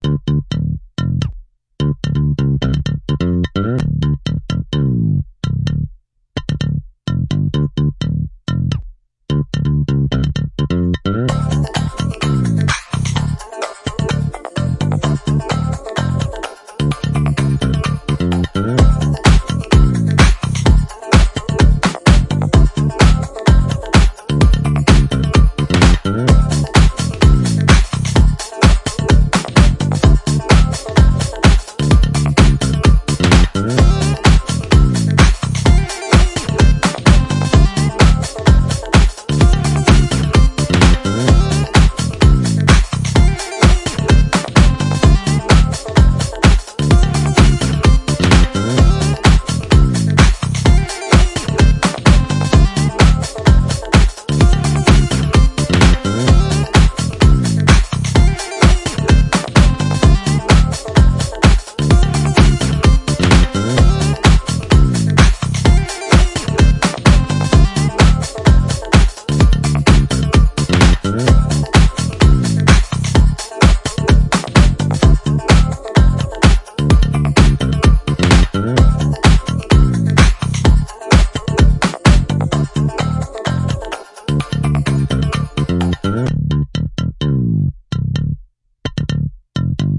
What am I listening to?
A retro style composition with a modern beat